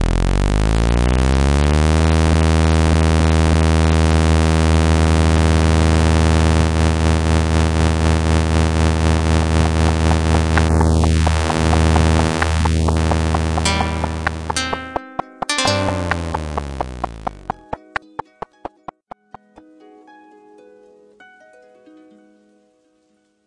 For this sound, I generated two square "sifflets", used a tremolo effect on them, then I generated a click "noise" track and some pluck sounds. Finally, I used harp sounds.
click-track, pluck, square